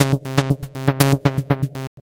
Robotic arp sequence
Robotic sounding Arp 2600 sequence